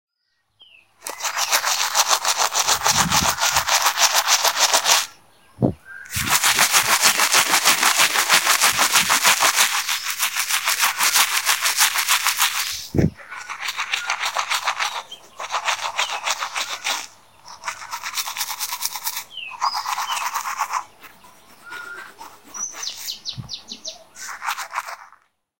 Tooth-brush bruising-teeth tooth brush brushing teeth vibrations toothbrush brushes cleaning
bruising-teeth, brush, brushes, brushing, cleaning, teeth, tooth, toothbrush, Tooth-brush, vibrations